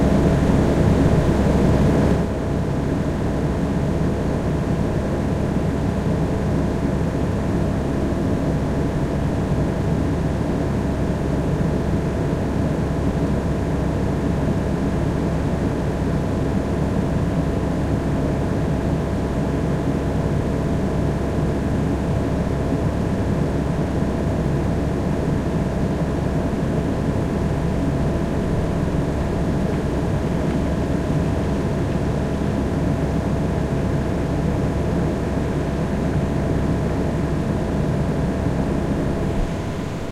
Fan Ventilation Stereo 4
Ventillation ambience from Lillehammer Norway